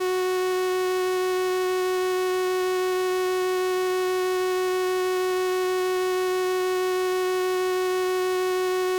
Transistor Organ Violin - F#4
Sample of an old combo organ set to its "Violin" setting.
Recorded with a DI-Box and a RME Babyface using Cubase.
Have fun!
vibrato, transistor-organ, analogue, vintage, combo-organ, strings, electronic-organ, 70s, sample, analog, electric-organ, string-emulation, raw